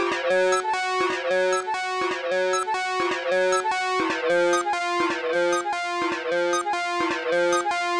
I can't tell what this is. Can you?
A kind of loop or something like, recorded from broken Medeli M30 synth, warped in Ableton.
broken, lo-fi, loop, motion